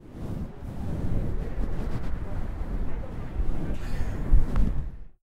Sound generated by the appearance of the air in outdoor. Moderate intensity level.
whooh, scl-upf13, wind